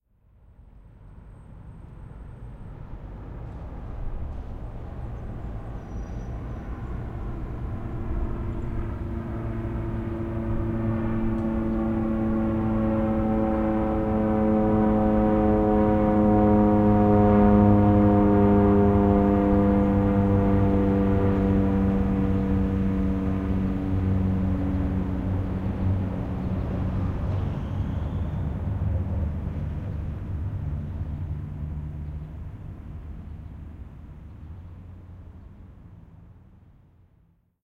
airplane single prop pass distant low moody
airplane, distant, pass, prop, single